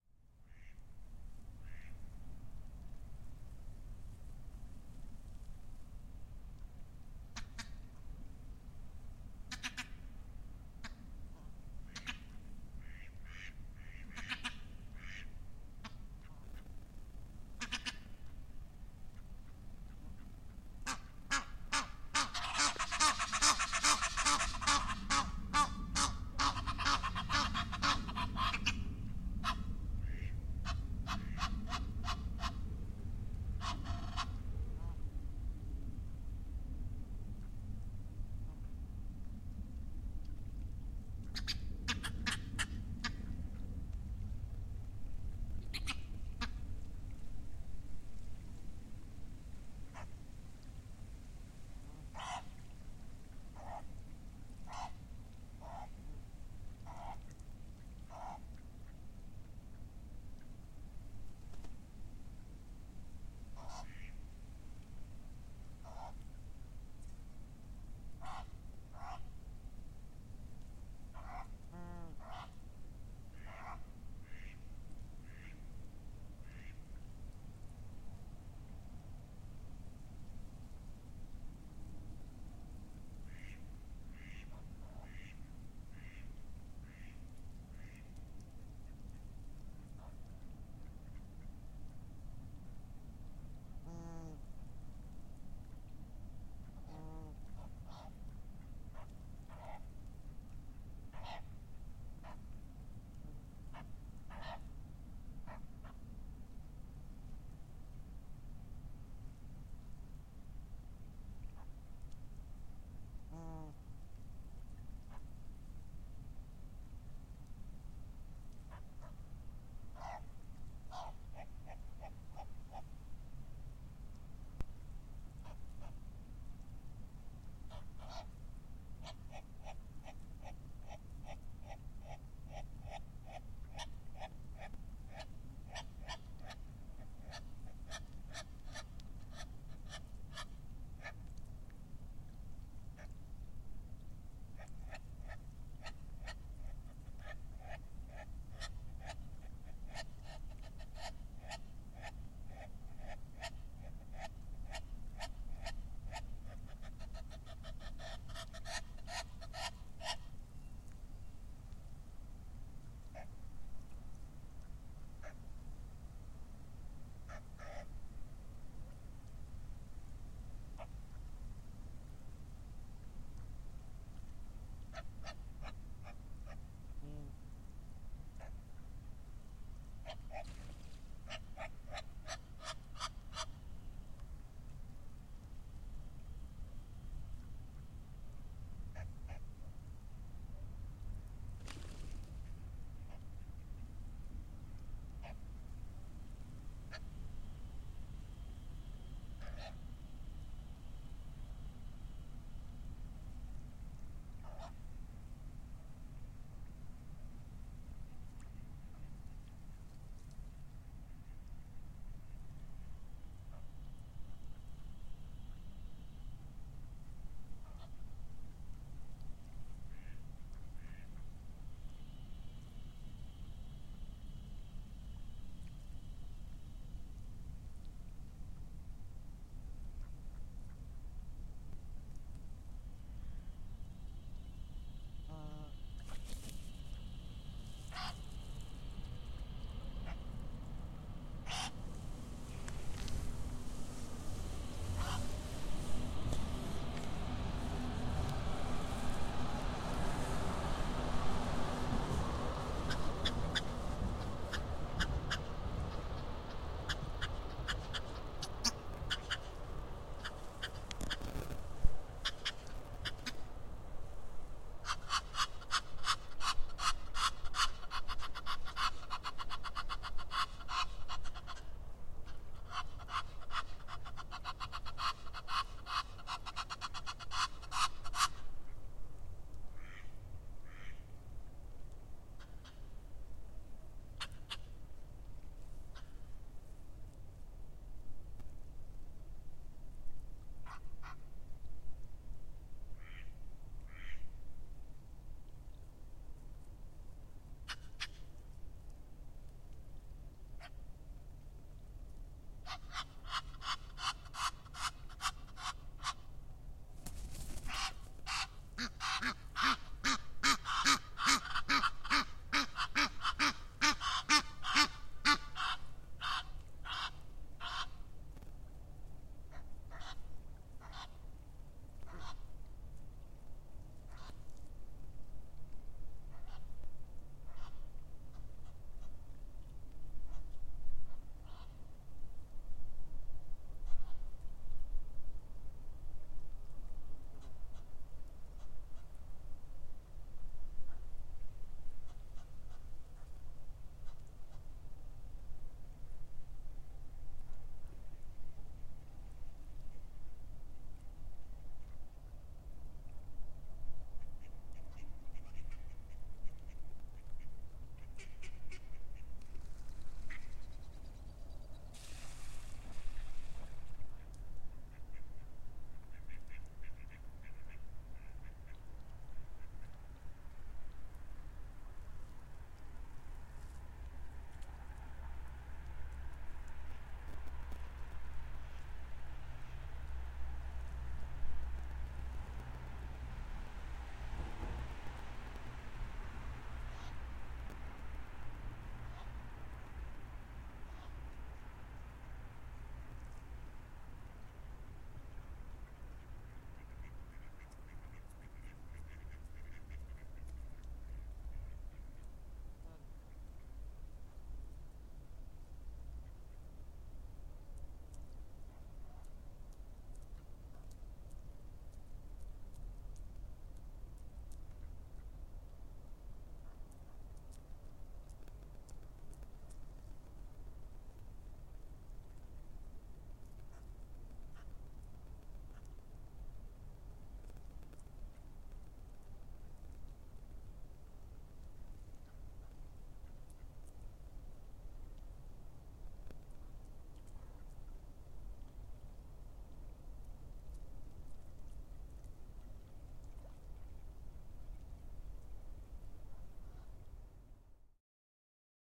A very clean recording od the city park Herngarten in Darmstadt during lockdown. Not many side sounds like cars etc. are hearable